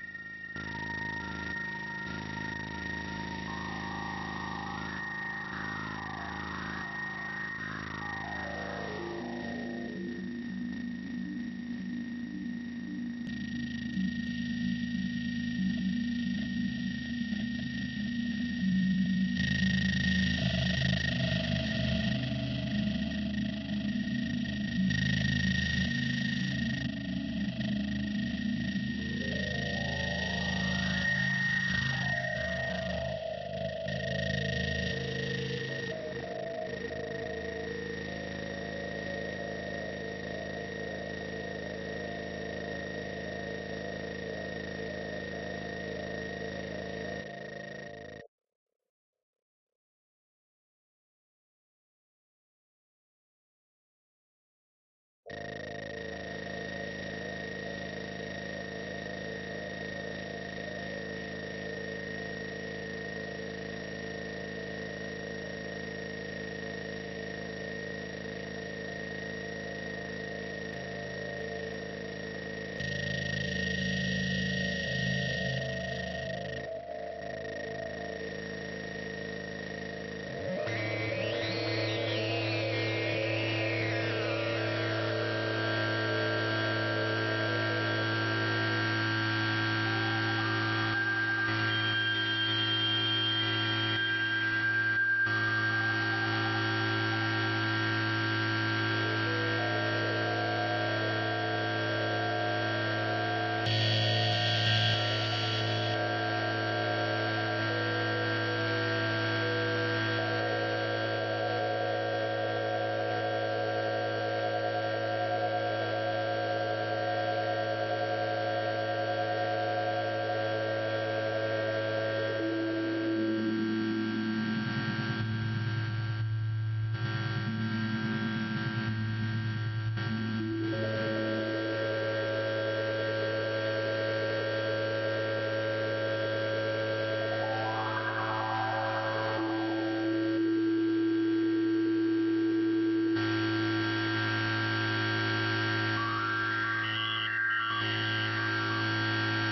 Feedbacking System Sqosc+pitch 16-Jul-2010 3
These sounds have been created in Ableton Live by a 'noise generating' VST which generates noise when fed any audio (or indeed, silence).
The audio signal then feedsback on itself. Sometimes some sort of filter was placed in the feedback loop and used to do filter sweeps.
I control some of the parameters in real-time to produce these sounds.
The results are to a great extent unpredictable, and sometimes you can tell I am fiddling with the parameters, trying to avoid a runaway feedback effect or the production of obnoxious sounds.
Sometimes I have to cut the volume or stop the feedback loop altogether.
On something like this always place a limiter on the master channel... unless you want to blow your speakers (and your ears) !
These sounds were created in Jul 2010.
chaos, chaotic, electronic, feedback, live-performance, noise, patterned-noise, random, self-generating, synthsized, unpredictable